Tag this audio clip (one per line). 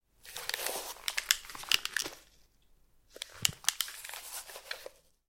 removing
field-recording
headphones
putting-on